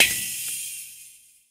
Mono samples of a small children's drum set recorded with 3 different "sticks". One is plastic with a blue rubber tip that came with a drum machine. One is a heavy green plastic stick from a previous toy drum. The third stick used is a thinner brown plastic one.
Drum consists of a bass drum (recorded using the kick pedal and the other 3 sticks), 2 different sized "tom" drums, and a cheesy cymbal that uses rattling rivets for an interesting effect.
Recorded with Olympus digital unit, inside and outside of each drum with various but minimal EQ and volume processing to make them usable. File names indicate the drum and stick used in each sample.